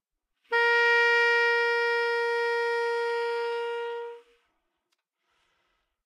Part of the Good-sounds dataset of monophonic instrumental sounds.
instrument::sax_tenor
note::A#
octave::4
midi note::58
good-sounds-id::5024